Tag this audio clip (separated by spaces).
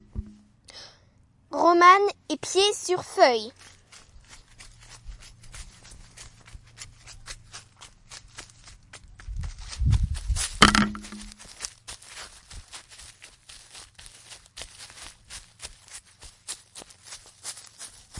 messac sonicsnaps france